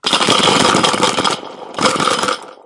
Cup with Random Stuff
Cup full of office supplies being shaken up